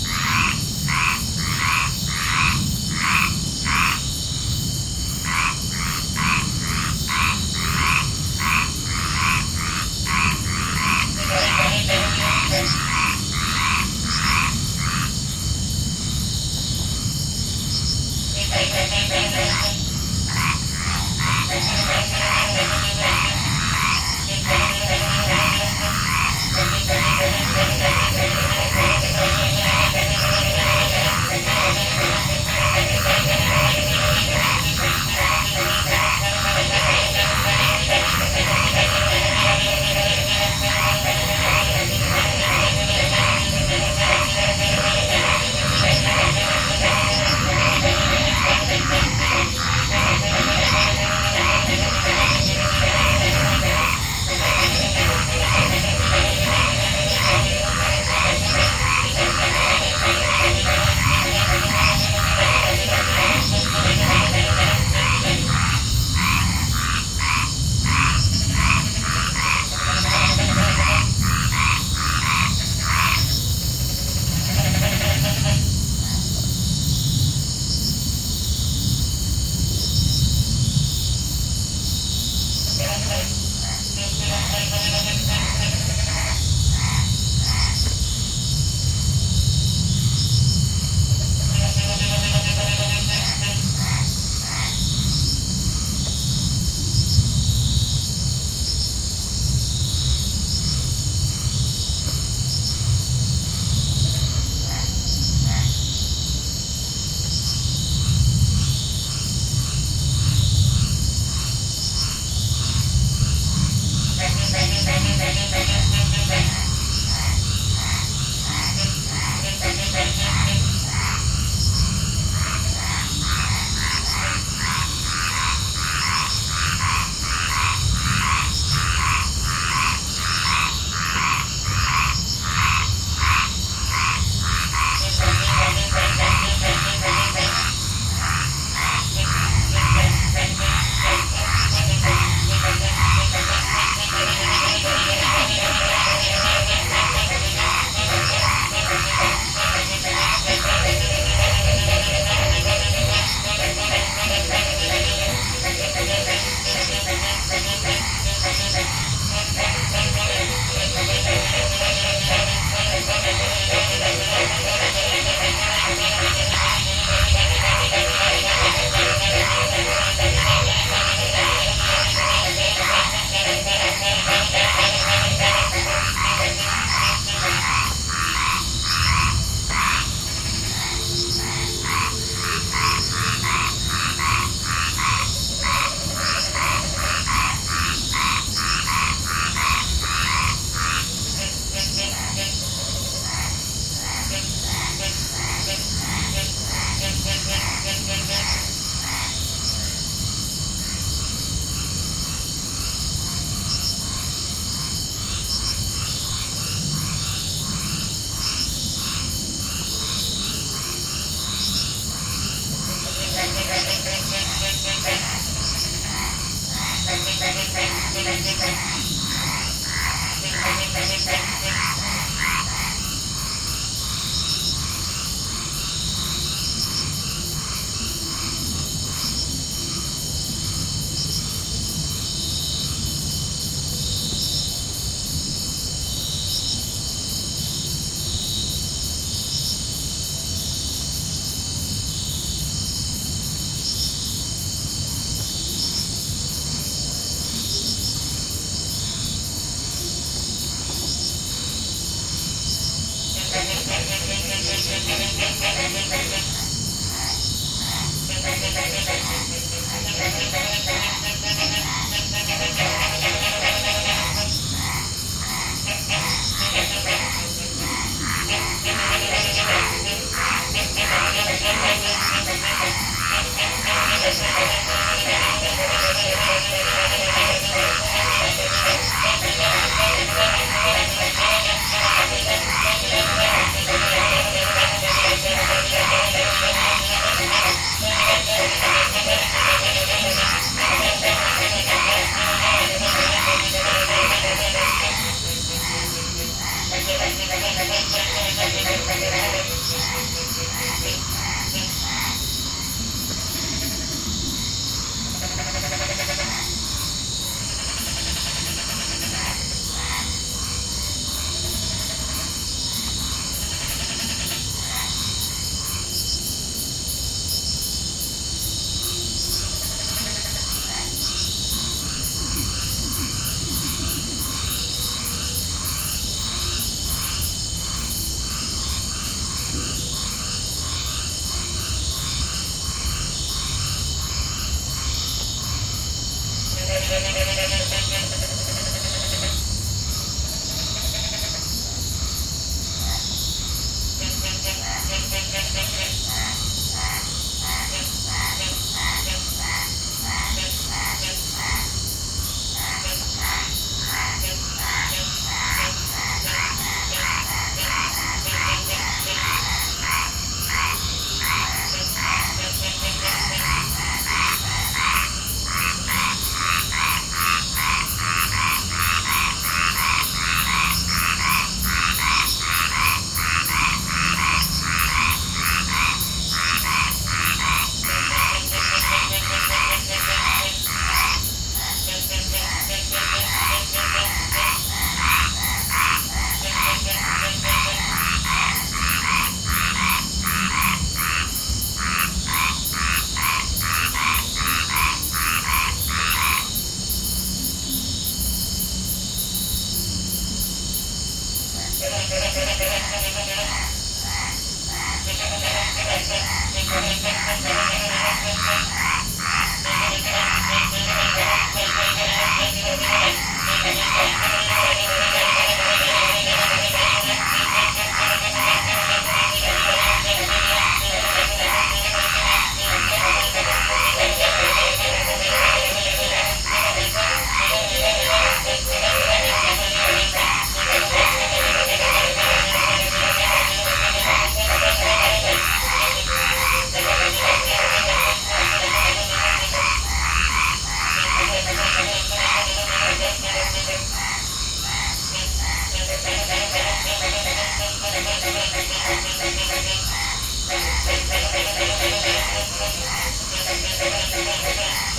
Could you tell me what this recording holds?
Forest near Calakmul, Campeche, Mexico
Night sounds near Mayan ruin Calakmul in southern Mexico.
field-recording, insects, nature